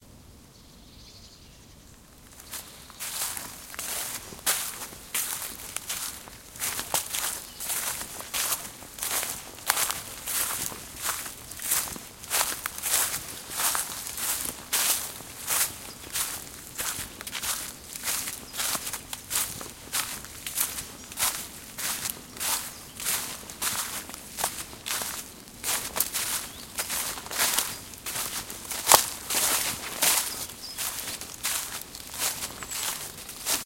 me walking on dry leaves in a forest in early spring
birds, field-recording, footstep, forest, leaves, step, walk, walking